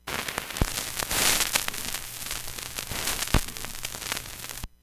Record noise recorded in cool edit with ION USB turntable.
noise, vinyl